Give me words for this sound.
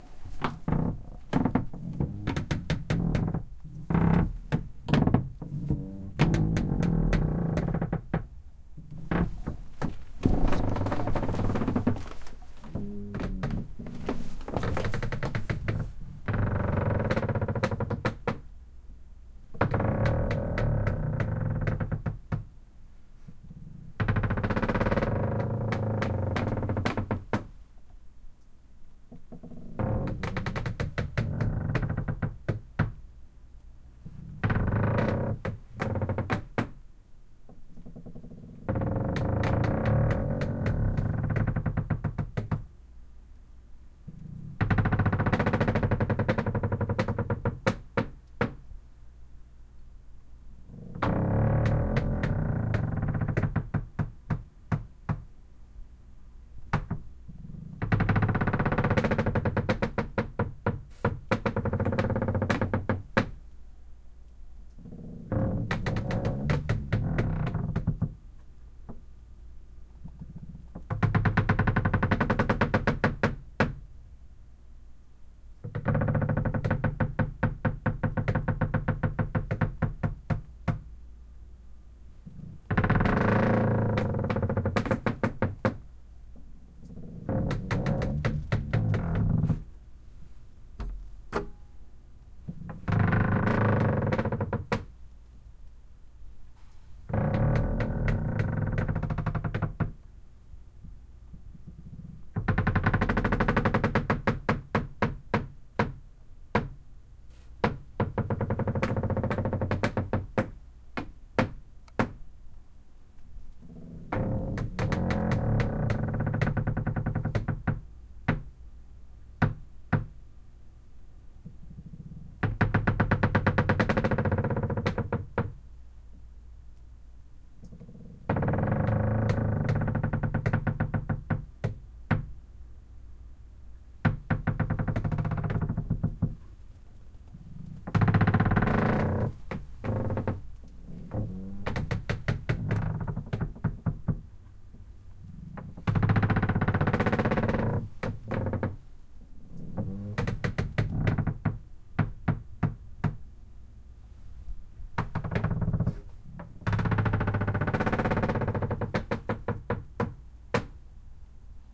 wood creaks deep long door or ship hull2
creaks, deep, door, hull, or, ship, wood